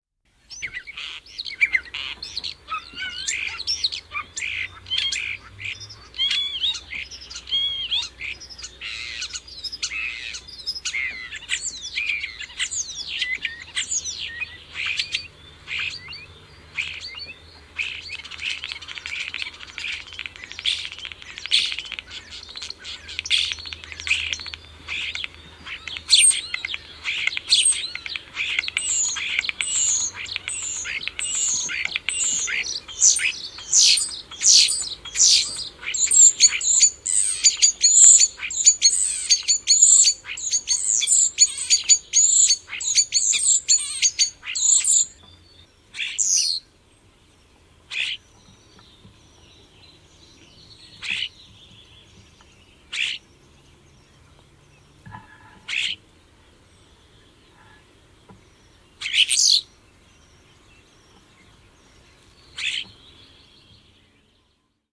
Kottarainen laulaa innokkaasti. Taustalla muita lintuja. (Sturnus vulgaris).
Paikka/Place: Suomi / Finland / Vihti
Aika/Date: 08.06.1968